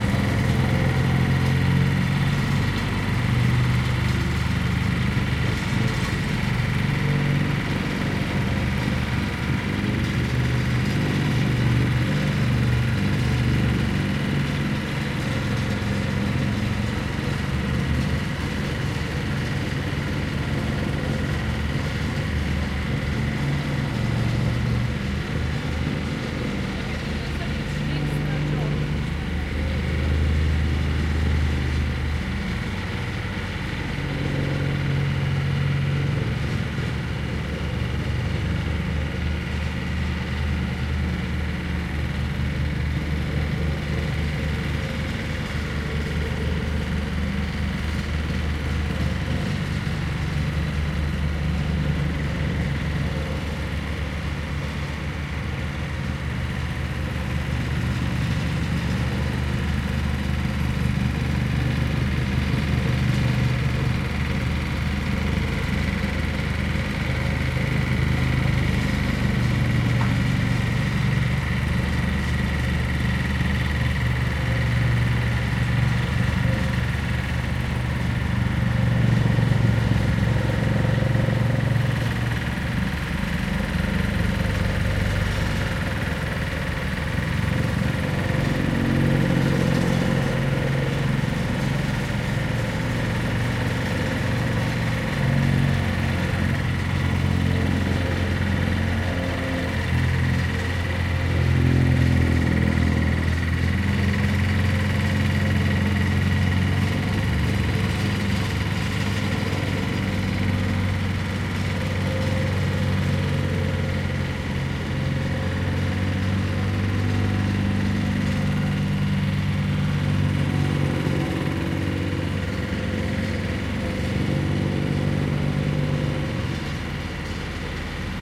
Construction site sounds COMPRESSOR
Sounds of the construction site. Compressing the ground for the concrete.
ground, building, hammer, work, construction, compressor, compressing, field-recording, hammering, constructing, street, gravel, builder, site